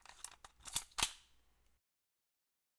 Mag in Chamber
This a sound of a magazine being put into the chamber of a standard pistol
magazine, pistol